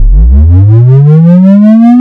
sinus wave 30Hz to 250Hz with tempo
250hz, sinus